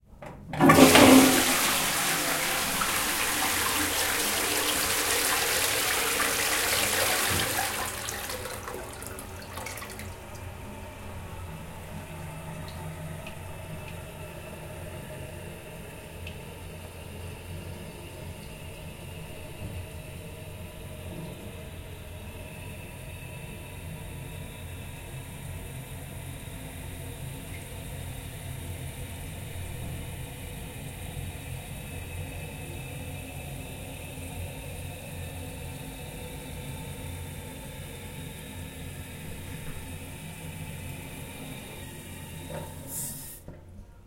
Bar toilette. WC flush and filling up. some music and crowd sound from the exterior.